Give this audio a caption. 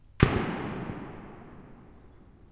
Distant gunfire 01
Distant gunfire. Not suitable for close-range shots, but could work as well as distant shots or even explosions.